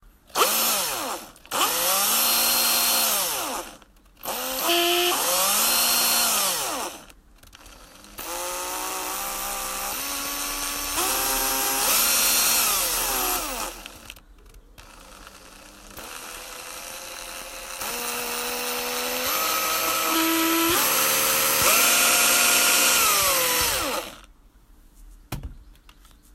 electric drill various speeds
power, tool, machine